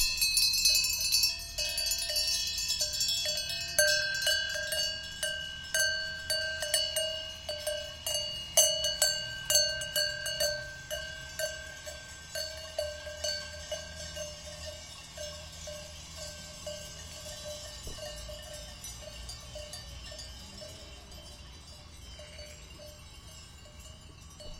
Flock of Sheep -Nov. 2011- Recorded with Zoom H2